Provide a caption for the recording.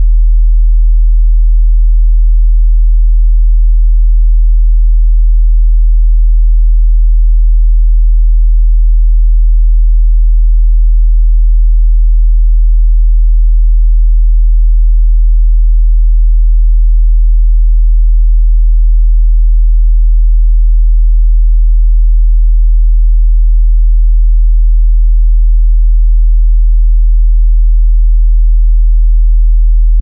Sub Bass01
This sound was created in Audicity and is very deep and good Atmosphere-maker in Videos. :) I hope you will enjoy it ;D
Dark, Bass, Atmosphere